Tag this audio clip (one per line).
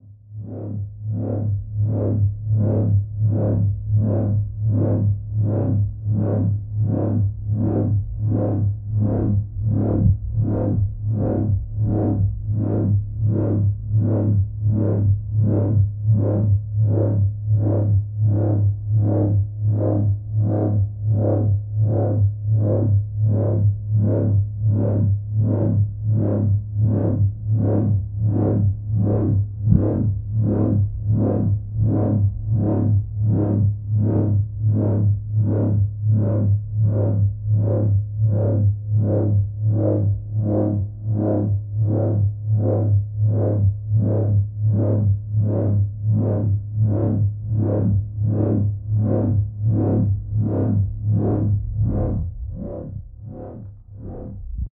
Electrics
Scifi
Sheild
Electronics